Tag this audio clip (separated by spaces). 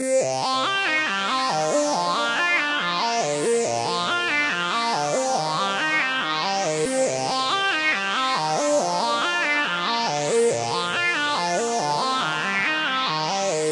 140-bpm bass beat club dance distorted distortion electronic flange hard loop melody pad phase progression sequence strings synth techno trance